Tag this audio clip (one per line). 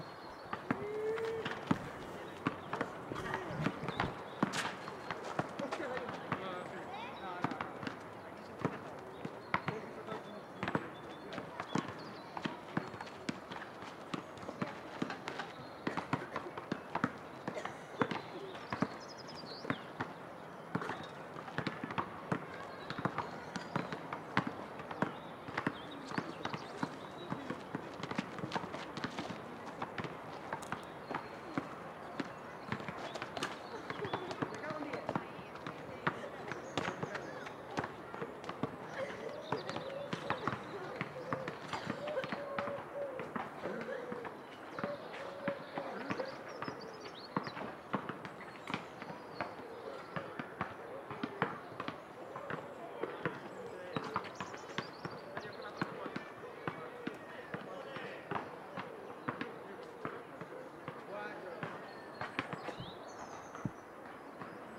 basketball
parks